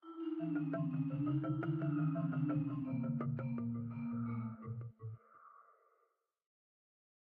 A marimba with multiple FX applied to it